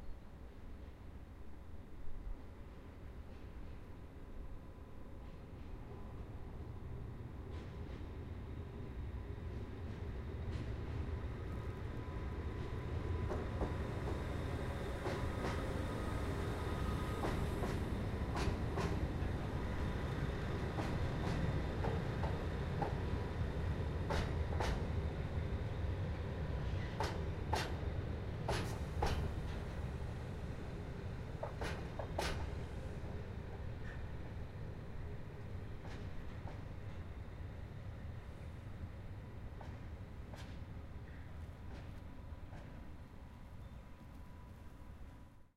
Train passing
A train without railcars passing at low speed. Recorded with a Zoom H1.
Locomotive, Passing, Rail, Train, Transport, Transportation, Travel